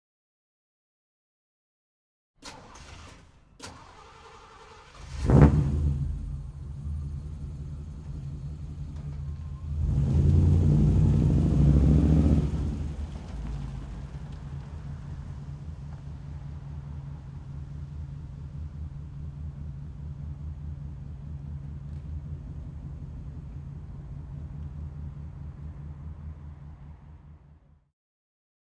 1950 Ford Mercury ignition, rev and cruise
Recorded on Zoom H4N with Rode NTG-3.
The sound a vintage 1950 Ford Mercury car with v8 engine starting up, briefly revving, then cruising recorded from outside.
1950, 50s, auto, automobile, car, cruise, drive, drive-by, engine, ford, hotrod, ignition, mercury, rev, start, v8, vehicle, vintage